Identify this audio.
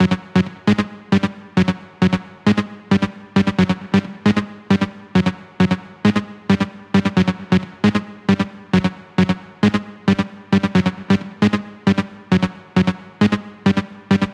This one is made with Synthmaster. It´s been created by a factory preset in the Bitwig Studio Piano Roll.
synth, techno